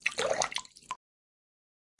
Small Pour 001
blop, aquatic, Run, Game, Water, Dripping, pouring, Running, Drip, Splash, bloop, wave, Slap, pour, aqua, crash, Movie, marine, River, Wet, Sea, Lake